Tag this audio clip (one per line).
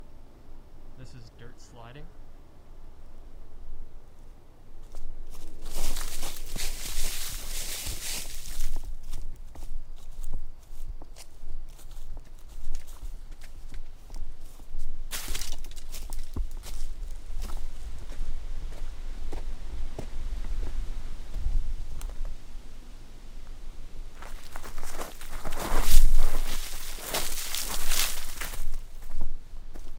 nature
field-recording
mono